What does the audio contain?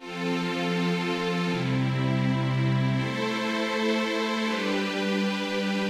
Background Strings
Backing strings used in the song Anthem 2007 by my band WaveSounds.
violin, strings, 162-bpm, background-strings, backing